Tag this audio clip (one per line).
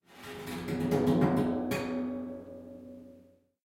metal
tapping